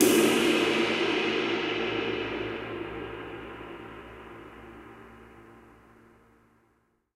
10 Ride Long Cymbals & Snares
Bosphorus, bronze, bubinga, click, Cooper, crash, custom, cymbal, cymbals, drum, drumset, hi-hat, Istambul, metronome, one, one-shot, ride, shot, snare, TRX, turkish, turks, wenge, wood, Young